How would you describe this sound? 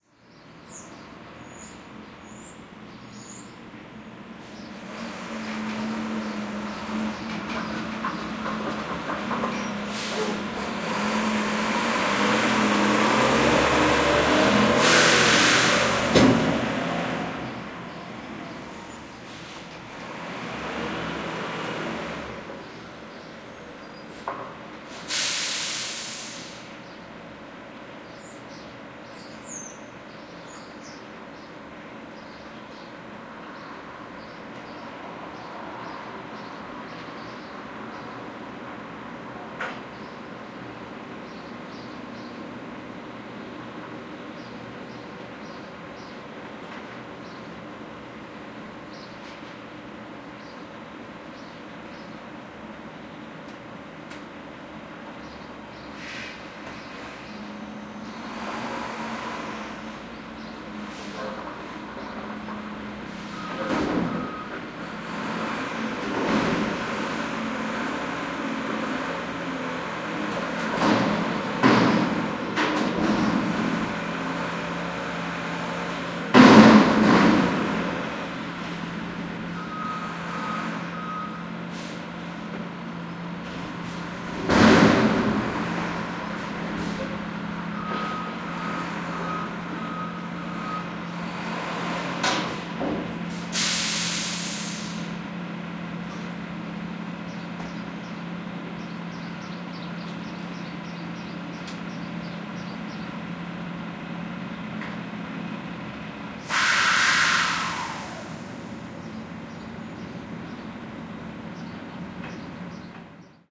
Garbage Truck 01
waste management truck empties dumpster early morning
management, basura, waste, truck, Garbage